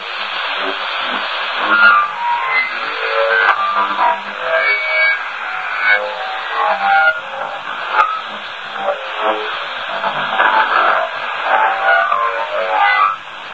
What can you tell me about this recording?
wispher record of semiprofessional...